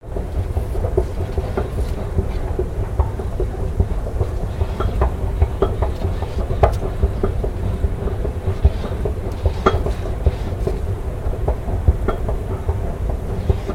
The sound of an escalator